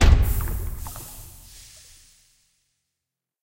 13862_adcbicycle_10
13853_adcbicycle_1
36963_krwoox_friture_long
What it is? A barrel filled with toxic fluids are dropped a few meters down to a hard floor. The fluid gets agitated and the barrel spews out some corrosive fluid onto a nearby wall.
Take 1.
barrel, corrosive, drop, fluid, toxic